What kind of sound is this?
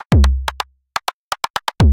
Rhythmmakerloop 125 bpm-41
This is a pure electro drumloop at 125 bpm
and 1 measure 4/4 long. A variation of loop 37 with the same name. An
overdriven kick and an electronic side stick. It is part of the
"Rhythmmaker pack 125 bpm" sample pack and was created using the Rhythmmaker ensemble within Native Instruments Reaktor. Mastering (EQ, Stereo Enhancer, Multi-Band expand/compress/limit, dither, fades at start and/or end) done within Wavelab.